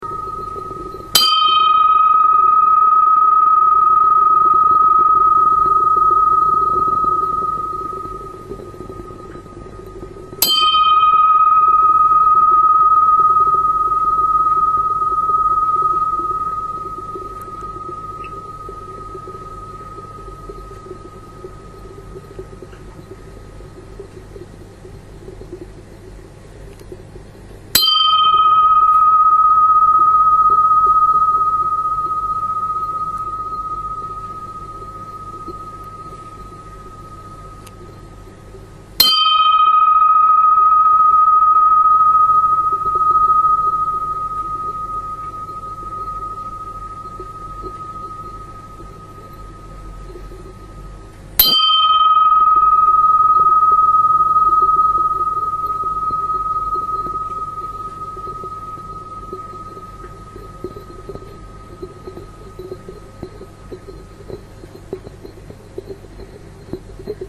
cukraus dangtelis42
metal lid spinning on various surfaces, hit by other objects